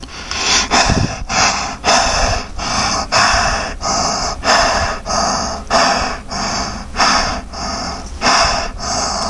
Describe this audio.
Efecto vocal grabado con PC
efecto, PC, vocal